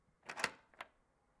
Door Lock
deadbolt, door, lock